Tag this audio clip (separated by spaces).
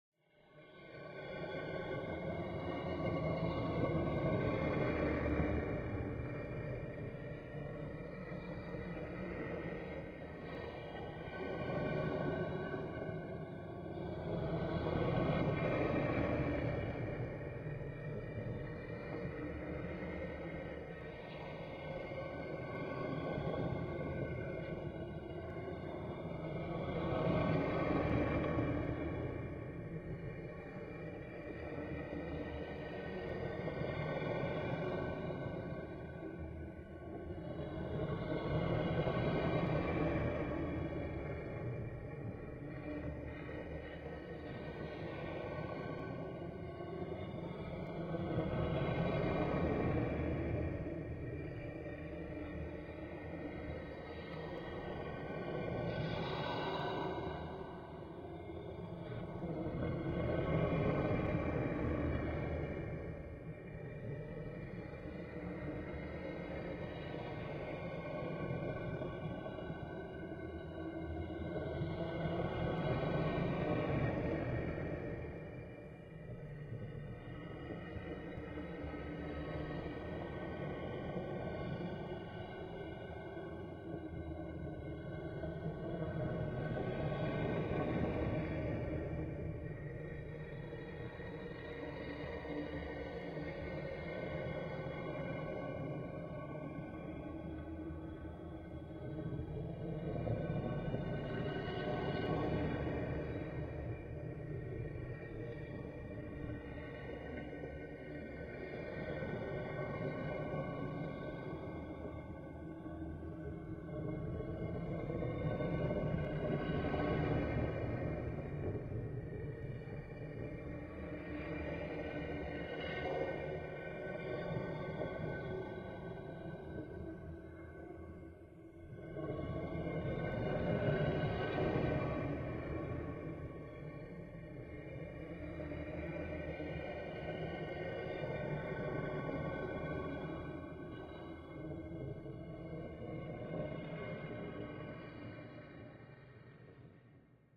Sci-Fi Ambient Sound-Design Environment Amb Ambiance Horror